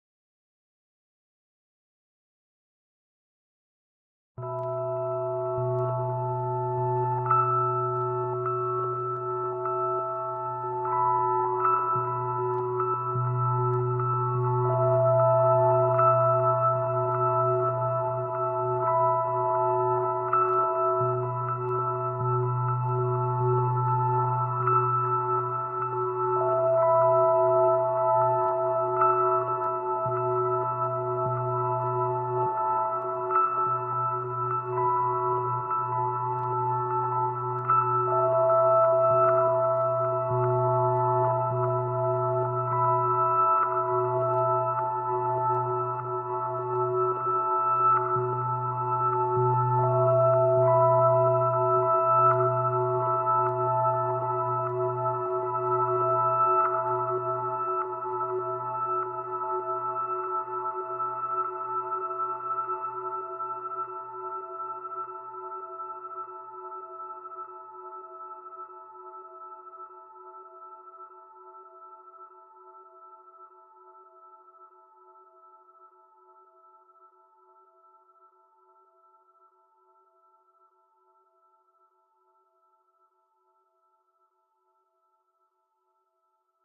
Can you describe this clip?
Stumbling, you find a clearing. There is a key on the ground near a smoldering fire. There is a path to the West...
ambient, atmosphere, cinematic, delicate, emotional, lonely, mysterious, piano, relaxing, rhodes